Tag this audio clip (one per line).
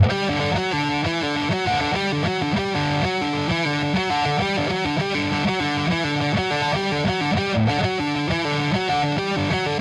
punk
lead
rock
solo
garage-band
4-bar
guitar
pop
distorted